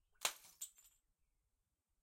Bottle Smash FF142
1 light, high pitch beer bottle smash, denser sound, hammer, liquid-filled